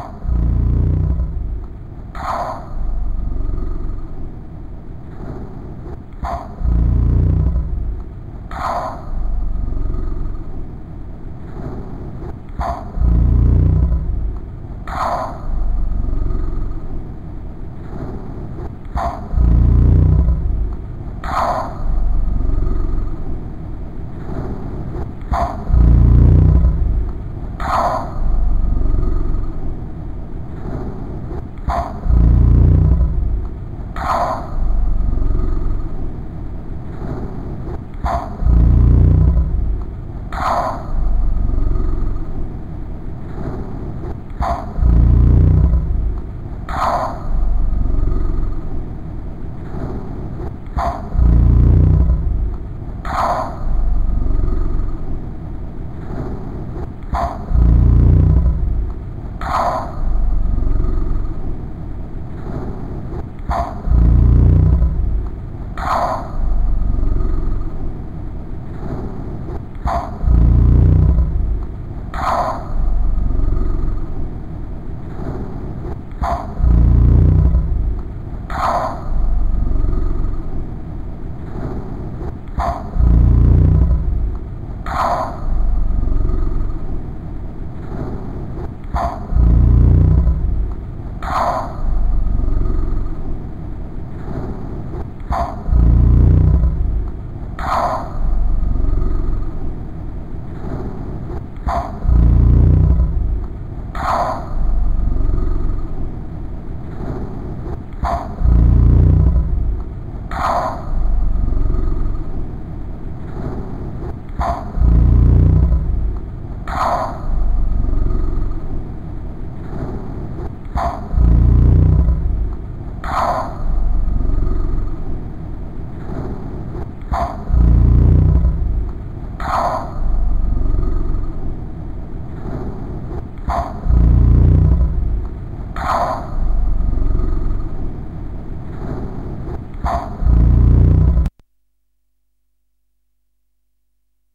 Composition made using SoundEdit 16 on Mac. This is a human voice saying an unknown word slowed down and looped.